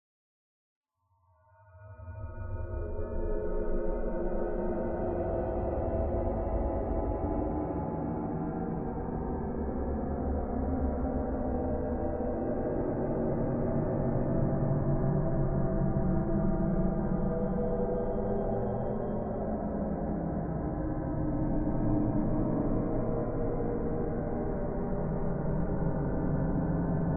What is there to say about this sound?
Eerie drone
A creepy droning sound that could be used as background for a horror or suspense film.
weird, creepy, horror, ominous, film, scary, suspenseful, strange, suspense